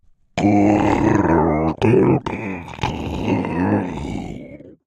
These are all me making terrible grunting growling snorting non-words for an offstage sea creature in a play but it could be anything monster like. Pitched down 4 semitones and compressed. One Creature is a tad crunchy/ overdriven. They sound particularly great through the WAVES doubler plugin..